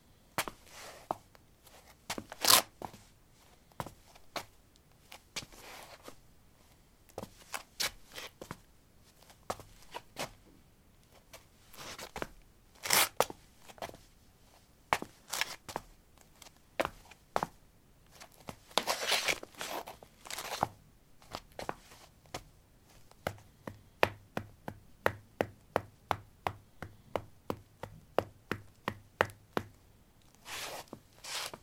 paving 05b summershoes shuffle tap
Shuffling on pavement tiles: summer shoes. Recorded with a ZOOM H2 in a basement of a house: a wooden container filled with earth onto which three larger paving slabs were placed. Normalized with Audacity.
footstep, footsteps, step, steps